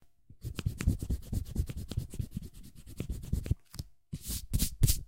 Attempting to fulfill a request for a pencil erasing. Recorded with a Rode NTG-2 mic into Zoom H4. Take #2.